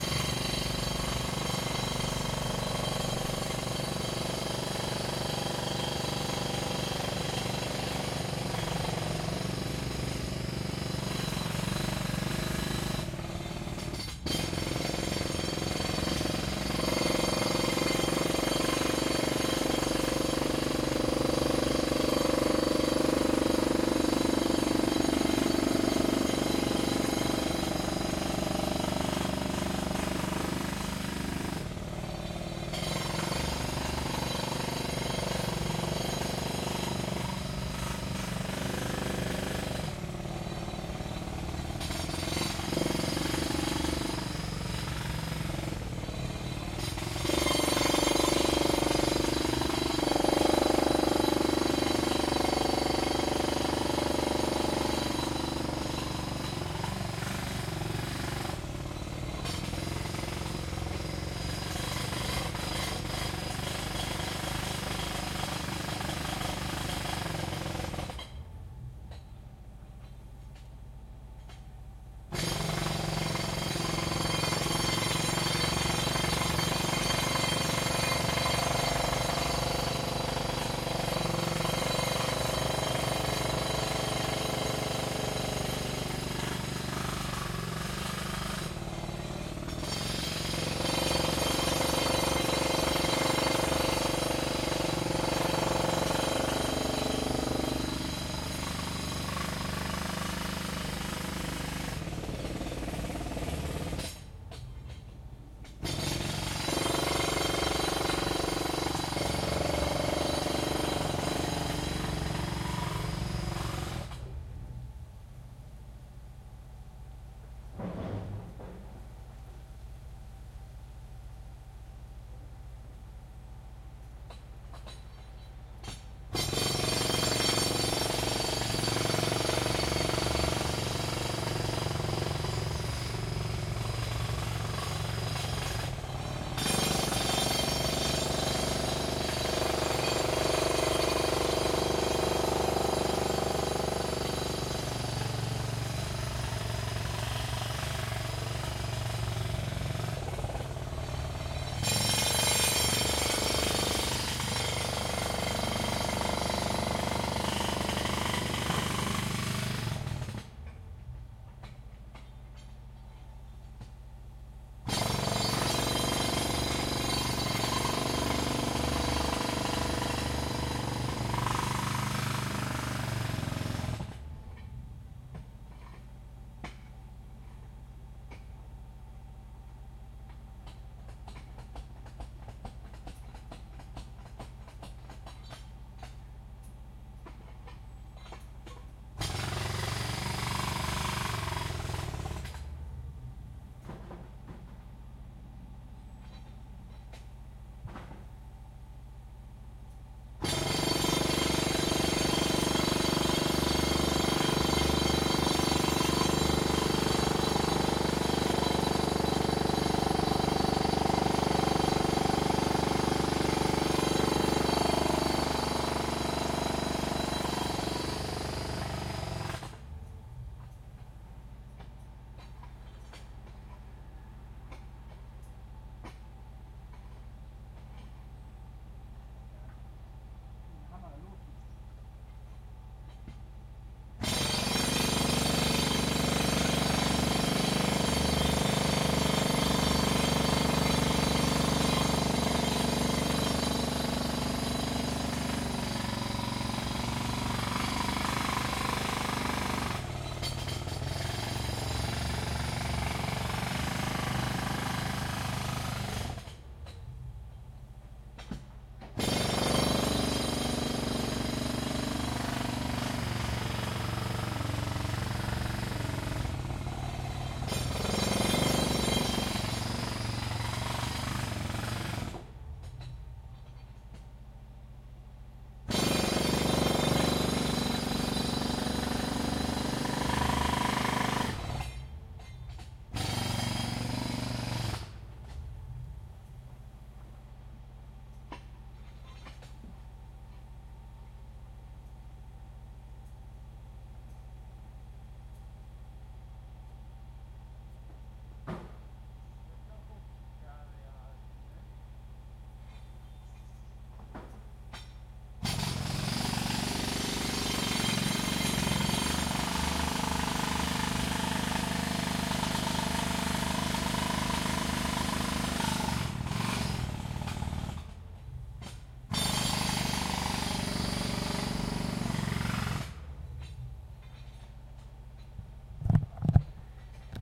A Jack Hammer hammering away at a construction site a few houses away. Recorded from an open window in Summer.